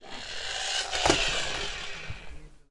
a toy car being played with by a baby.